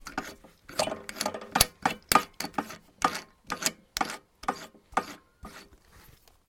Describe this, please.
Drillpress chuck spinning metalic metal tool workshop 2
a short metalic sound created by spinning a drill chuck by hand.
drillpress, workshop, clang, metalic, drill, metal, press, tool